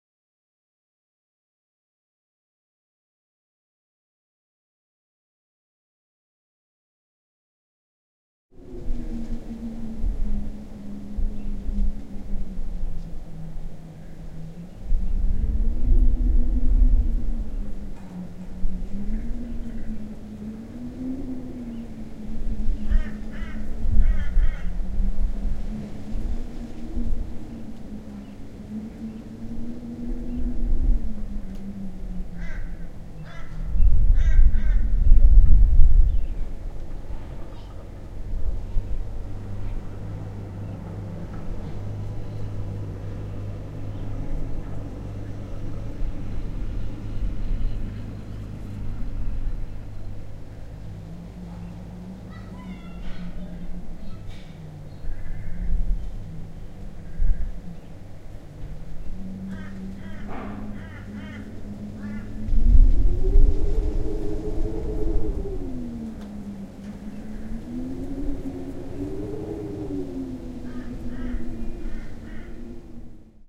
Wind in Pine Trees
Wind in the trees with other ambient sounds like cockotoos and magpies.
australian, trees, field-recording, australia, galah, cockatoos